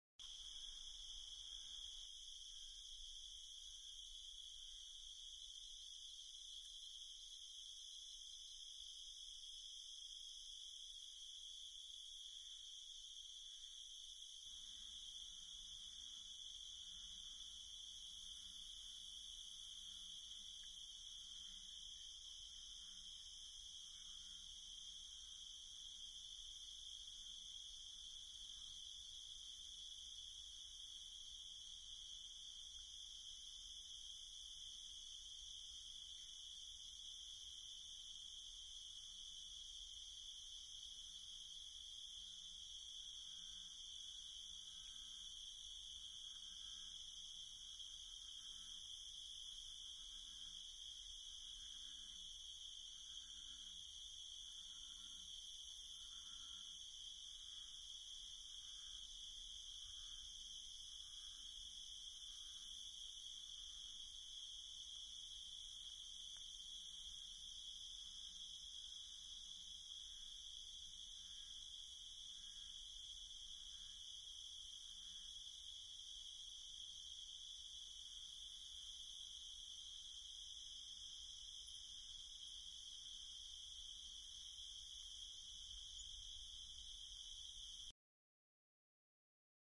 Night Crickets-Hi Frequency
Recorded at 2 am at the Bosque Del Apache Wildlife Santuary & Wetlands in New Mexico. Recorded using a Crown SASS-P Microphone with a Tascam DP-1A Dat Recorder
ambience, bullfrogs, crickets, field-recording, nature